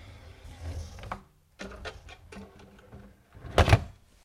Drawer sliding closed
cloth, fabric, hiss, metal, object, slide, swish